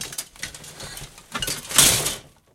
Rummaging through a closet of metal objects
chaotic, clatter, crash, objects